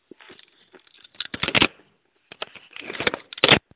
Telephone hang up. This one consist from two, because the called person probably did something wrong at the firs time. Recorded via caller's perspective via call recorder.
call, click, drop, hang-up, phone